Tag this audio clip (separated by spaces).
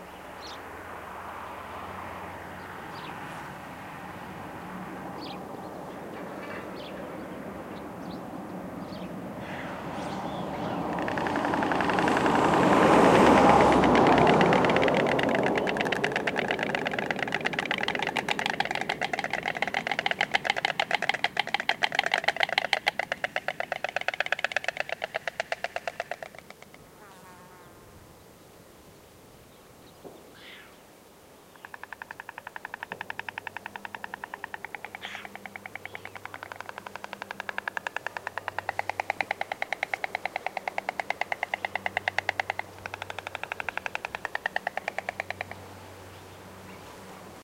clapping south-spain nature car stork field-recording birds winter